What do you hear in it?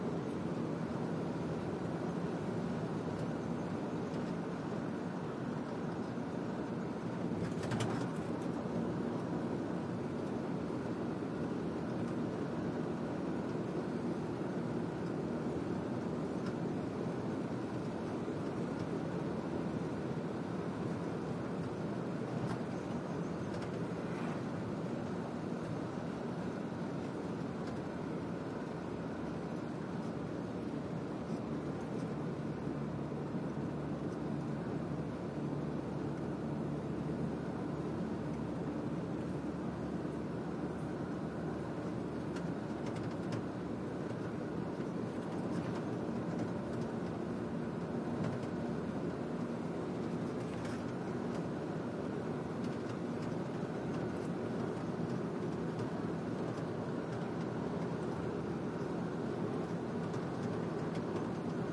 auto truck van int driving high speed highway a bit bumpy window open right2